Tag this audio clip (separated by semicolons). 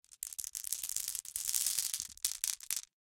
pour; glass